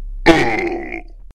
A sound I made a couple of years with my voice and filters in Audacity. It came out surprisingly well, so I thought I'd share it. :D